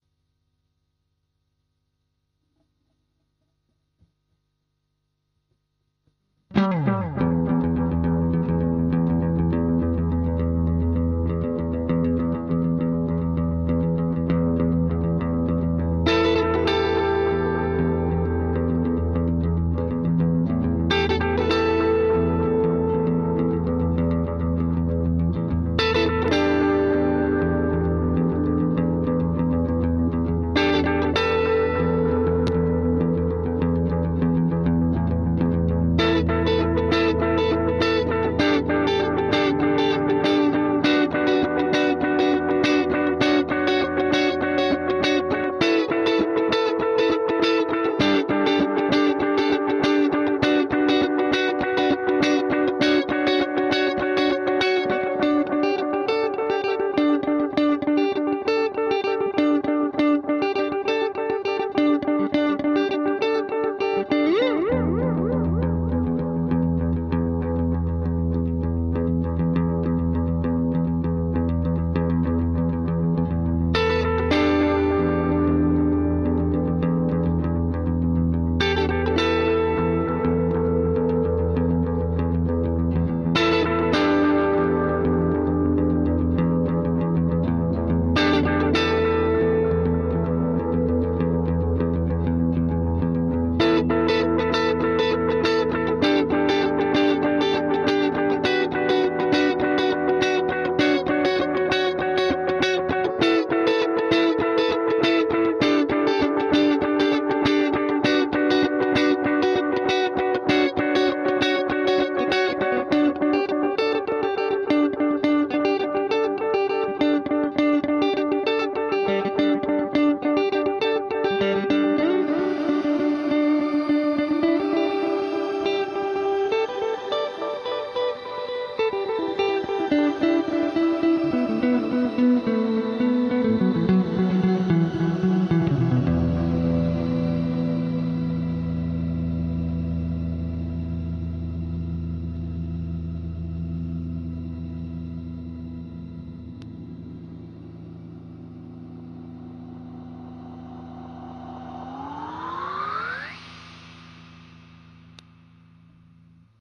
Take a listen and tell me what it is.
race in space
atmospheric song, played by electric guitar, using delay. temp 100, side 4\4.